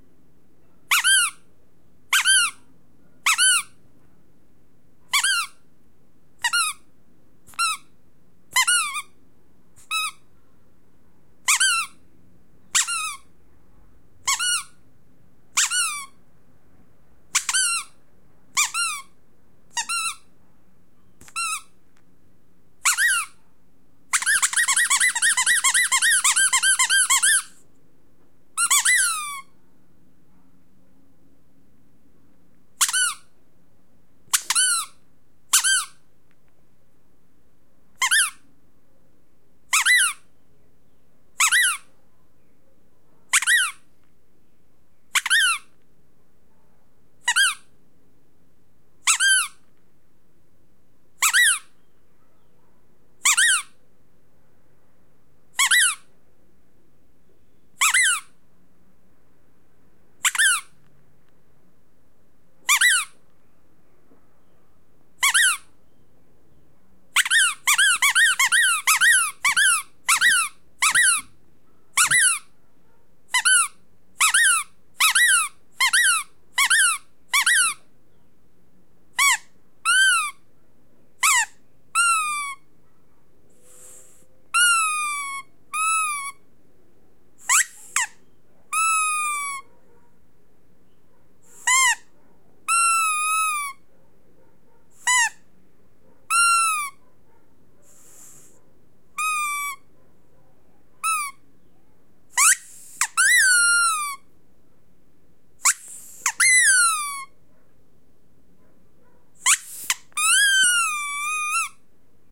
squeak-toy-squeeze full02
A rubber squeaky toy being squeezed. Recorded with a Zoom H4n portable recorder.